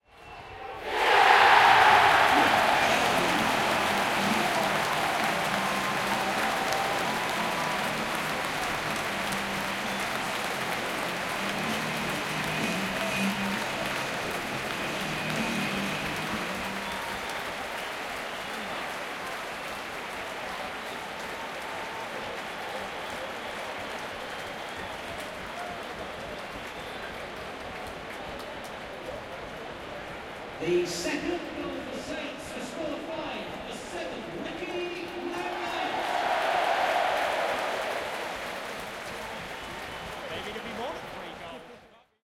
Football Crowd - Goal Cheer - Southampton Vs Hull at Saint Mary's Stadium
Recorded at Southampton FC Saint Mary's stadium. Southampton VS Hull. Mixture of oohs and cheers.
Boo, Cheer, Football, Football-Crowd, Large-Crowd, Southampton-FC